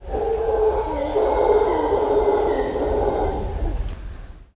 Howler Monkeys - short clip
Short clip of Howler Monkeys recorded in the Osa Peninsula of Costa Rica, December 2015. Recorded with an iPhone.
growl, howl, howler-monkey, jungle, monkey, nature, primate, roar